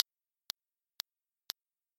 One 4/4 measure of loopable 120 BPM clicks. The beat length is exactly 22,050 samples. The clicks are offset to the right a few samples because some players put a speaker-protecting fade before playing, lopping off the first beat if the beats are not offset slightly. The clicks each consist of three wave cycles. The first fades in, the middle one is full, and the last fades out so it should be easy on your speakers but close as possible to a single cycle impulse for accurate alignment. The first beat of the four is slightly louder. There are four beats.
clapper, click, loop, metronome, sample-accurate, sync, time-align